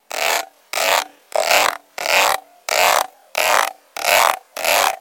Hattab margaux 2017 2018 scratch
For this sound, I recorded two sounds that I assembled, I increased the speed (3.000) and changed the tempo (-62) to reproduce a scratching sound.
fork, rustle, scratch